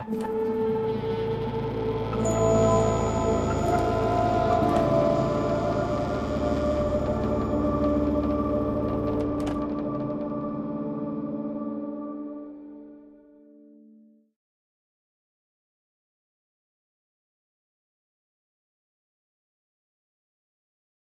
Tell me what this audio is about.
Here's an ambient horror logo that can be used as an intro title sound effect or as an atmospheric element in a horror movie. Made in FL Studio using the Kinetic Metal plugin from Native Instruments.
creaking, dark, intro, door, cool, creepy, weird, sinister, suspense, horror, drone, deep, soundscape, sfx, spooky, ambient, artificial, creaky, sound, metal, atmosphere, title, reverb, haunted, freaky, logo, pad, effect, industrial, scary